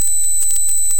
Experiments with noises Mandelbrot set generating function (z[n + 1] = z[n]^2 + c) modified to always converge by making absolute value stay below one by taking 1/z of the result if it's over 1.